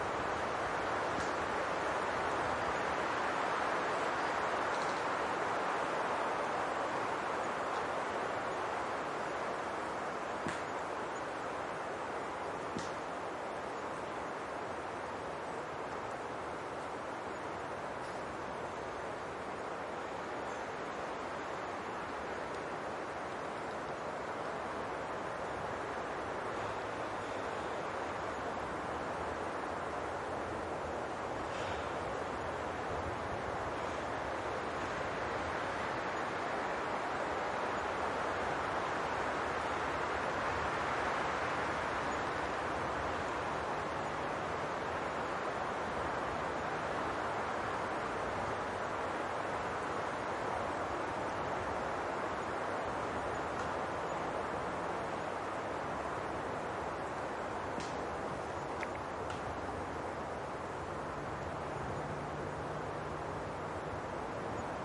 Wind in pine trees
Wind blowing in a pinewood. Stereo. Recorded on Marantz PMD 66O and a pair of Senheiser K6 cardioid.